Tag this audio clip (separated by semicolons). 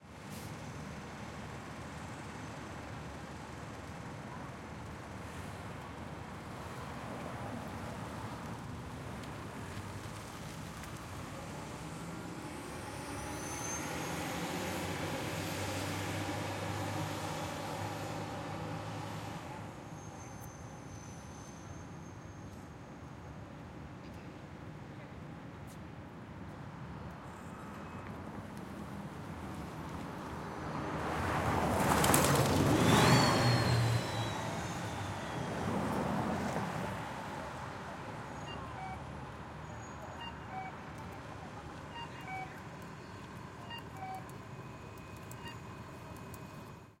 CMU; Carnegie-Mellon-University; Pittsburgh; beep; boop; bus; crossing; field-recording; intersection; outdoors; signal; street; traffic; voices